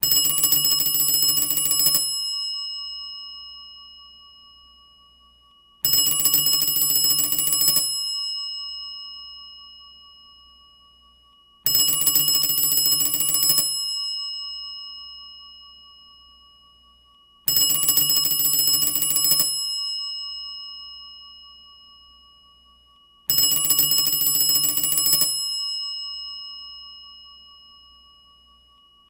antique phone - manually operated
An antique crank phone that didn't work - but I needed to hear how it would have sounded - I manually tapped the bell with it's clapper at a regular pulse... copied that file to another track and offset it so as to double it's pulses. Recorded with one channel from a Sony ECM-99 stereo microphone to SonyMD (MZ-N707)
antique, phone